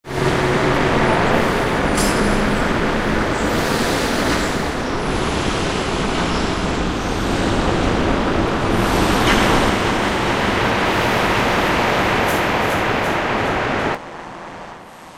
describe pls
noisy drone sounds based on fieldrecordings, nice to layer with deep basses for dubstep sounds
noise, dub, experimental, sounddesign, reaktor, drones, fieldrecording